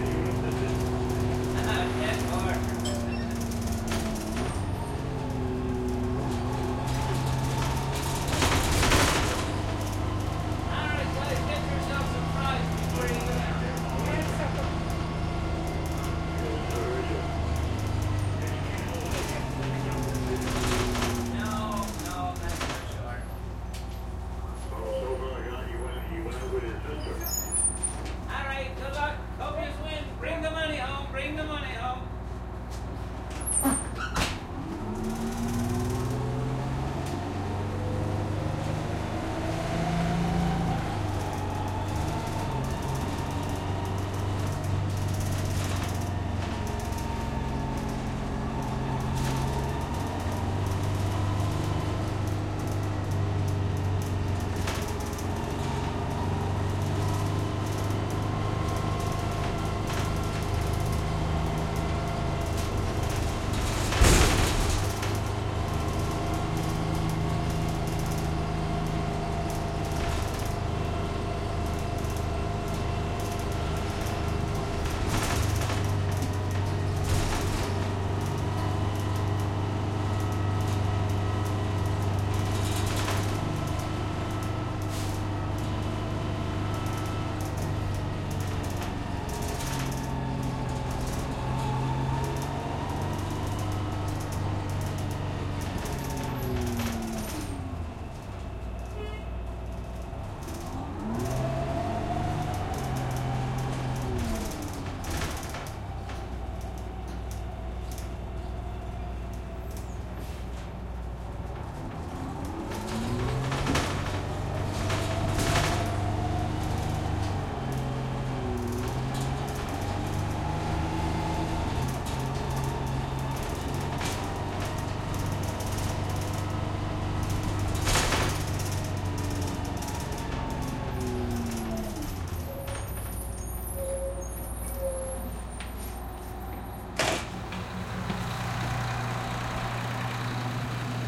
Rickety Bus Ambience 2
Ambient recording of a ride on an old bus (1997 New Flyer D40LF).
Bus structure rattles as it runs over the rough road. Friendly driver, carrying on with his passengers. Someone pulls the "stop request" cord; after a moment, the bus stops, doors open. Driver wishes the passengers luck about some sporting event ("Bring the money home! Bring the money home!") then closes the doors and the bus resumes its trip.
Bus hits a pothole at 1:05.
At 1:40, bus slows down to make a turn at an intersection.
At 2:15, bus stops. Door in front of me opens; I step off.